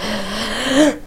You can hear the very heavy breathe of a female. It has been recorded in a recording classroom at Pompeu Fabra University.